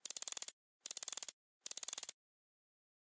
declicking aperture
short audio file of a lense's aperture being declicked creating a short clicking sound
declicking, click, photo, owi, camera, motors, photography, lens, film, shutter, aperture